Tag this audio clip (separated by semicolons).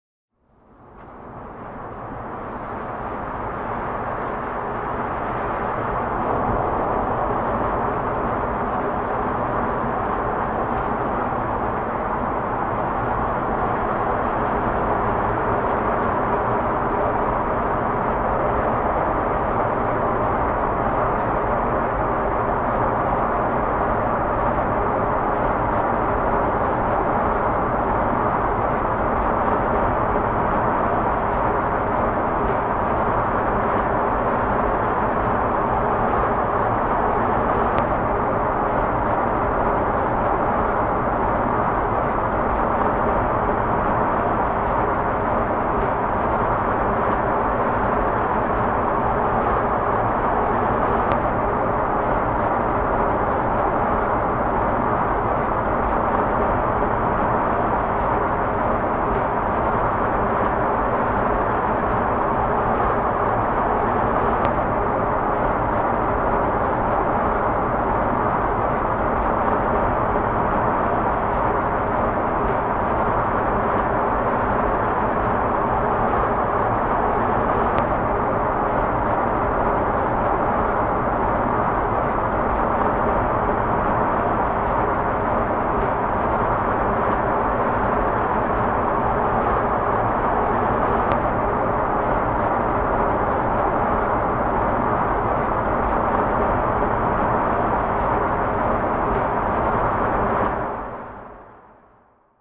ambience; cars; city; highway; noise; pollution; road; street; traffic; urban